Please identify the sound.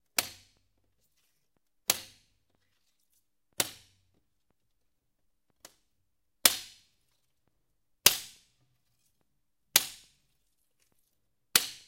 Windows being broken with vaitous objects. Also includes scratching.
break, indoor, breaking-glass, window